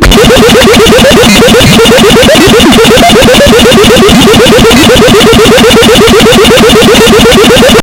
Glitch Beat 7
murderbreak, just-plain-mental, rythmic-distortion, bending, core, circuit-bent, coleco, glitch, experimental